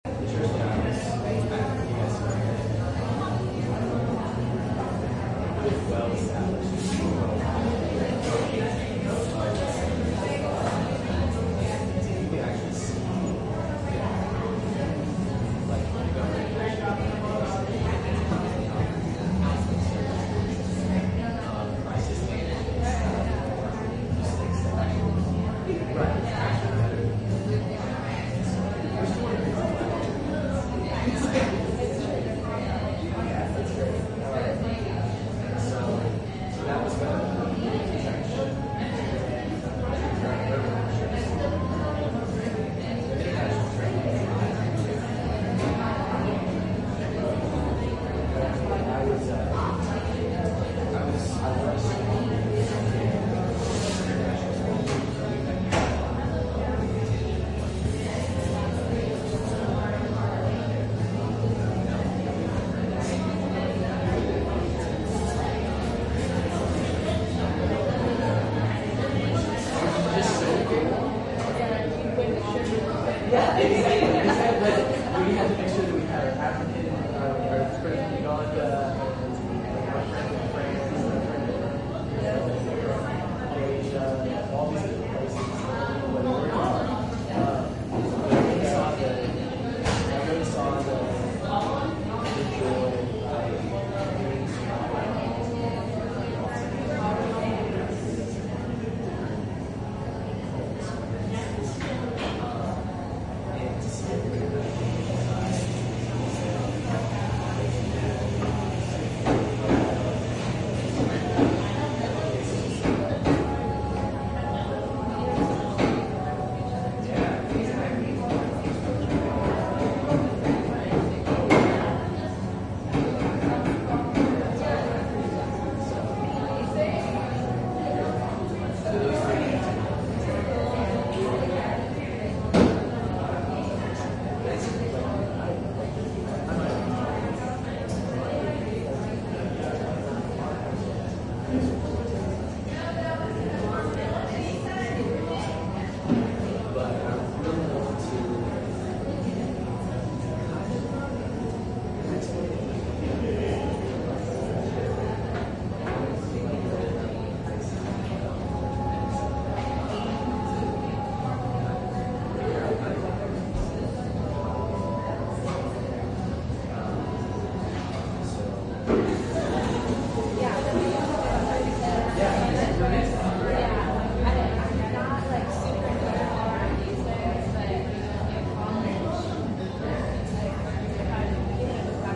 Busy Coffee Shop
A busy, friendly coffee shop ambiance. Recorded on a ZTE Axon 7 Mini.
Arkansas, background, beans, Bentonville, blender, cafe, coffee, conversation, espresso, friends, froth, grinder, grinding, Kennedy, mill, music, noise, people, phone, Rogers, shop, social, socialising, socializing, store, talk